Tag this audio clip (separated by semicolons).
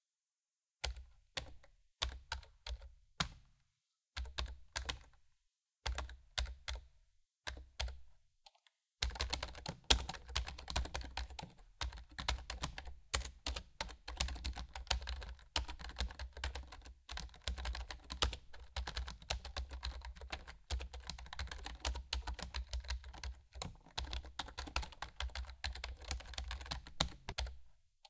computer; keyboard; numerical; typing